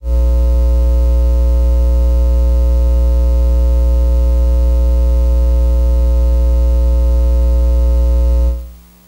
Various sources of electromagnetic interference recorded with old magnetic telephone headset recorder and Olympus DS-40, converted and edited in Wavosaur. Lamp.
electromagnetic, noise, hum, radiation, intereference